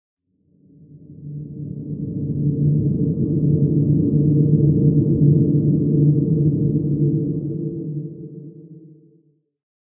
Passing Ship
Pad sound, reminiscent of a passing space ship from a sci-fi movie.